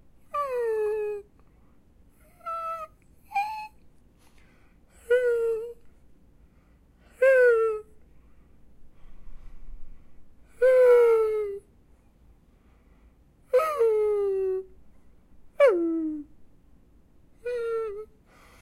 Dog whining impression
This is an impression of a dog whimpering. Recorded on an H4N.
dog, whining